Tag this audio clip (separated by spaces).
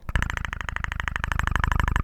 clicking effect predator smacking snaping speak thinking